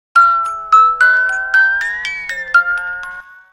JITB Part 1 short bendy 3528ms
Pitch-bended first part of pop goes the weasel, I used this in my pneumatic jack-in-the-box halloween prop.
pop-goes-the-weasel, jack-in-the-box, halloween